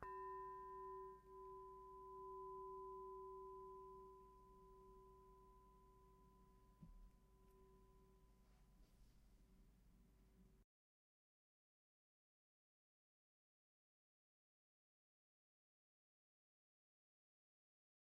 Heatsink Large - 02 - Audio - big heatsink
Various samples of a large and small heatsink being hit. Some computer noise and appended silences (due to a batch export).
bell, heatsink, hit, ring